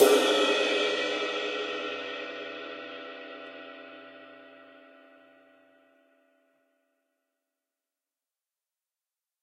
KZildjianIstanbul20Ride2220GramsSkibaModifiedWash
Sampled from a 20 inch K. Zildjian Istanbul ride from the 1950s, and subsequently modified by master cymbal smith Mike Skiba for a final weight of 2220 grams. Recorded with stereo PM mics.This is a tap on the bow of the cymbal using the shank of the stick to produce wash or sustain, and can be layered with the "bow" sample to produce hits of varying strengths or velocities.
vintage, istanbul, cymbal, drums, skiba, zildjian, percussion, ride